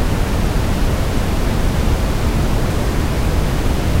Korg MS50 Pink Noise
Just plain noise. Use this to modulate analog gear or similar.
pink, ms50, korg, noise